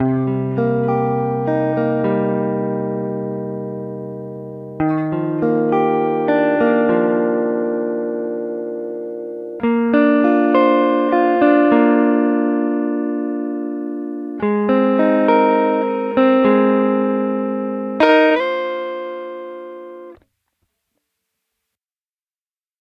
indie rockin' 5
Please use it sample and make something good :-)
If you use this riff please write my name as a author of this sample. Thanks. 90bpm
background, drama, dramatic, emo, evil, film, good, guitar, impending, mellow, movie, peaceful, plucked, relaxed, repetition, satan, threatening, tranquil, vs